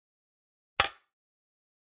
Hitting Metal 08
dispose, rubbish, metallic, garbage, iron, hit, metal, impact